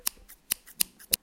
essen mysounds sarah
scissor cutting the air
germany, mysound, object, Essen